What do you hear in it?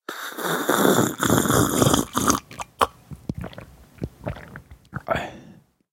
Drinking a glass of water 02
Drinking a glass of water. Cleaned with floorfish.